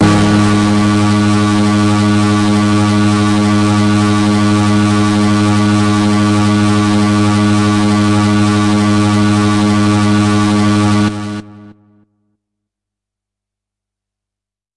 Harsh Lead - G#1
This is a sample from my Q Rack hardware synth. It is part of the "Q multi 010: Harsh Lead" sample pack. The sound is on the key in the name of the file. A hard, harsh lead sound.